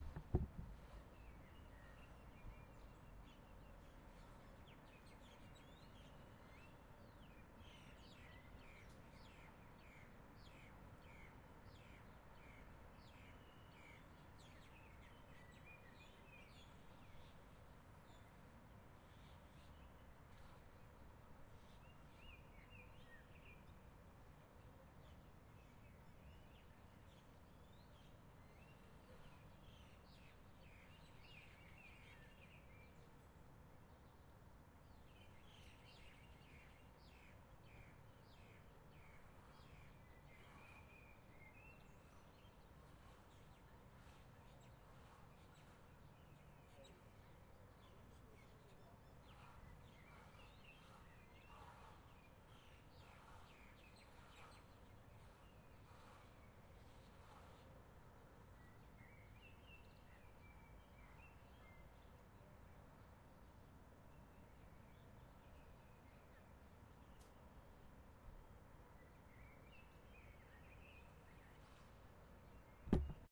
Recording a nearby highway from my bedroom window in Tel Aviv, Israel. The sound is pretty uniform, there are no special noises in the middle.